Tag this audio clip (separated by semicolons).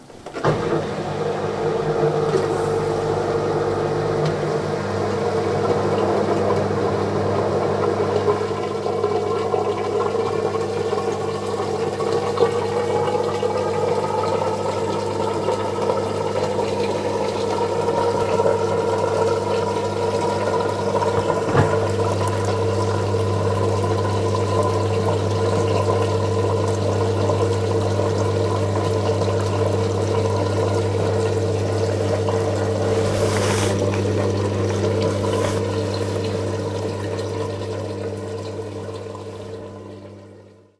bath; bathroom; domestic; drain; drip; dripping; drying; faucet; Home; kitchen; Machine; mechanical; Room; running; sink; spin; spinning; tap; wash; Washing; water